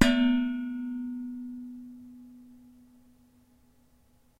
Hitting a large pot lid
bang, kitchen, lid, metal